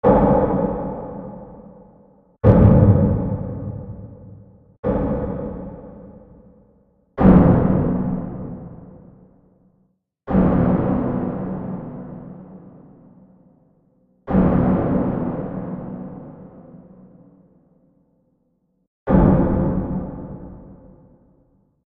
Cinematic Impact Boom
Boom, Cinematic, Hit, impact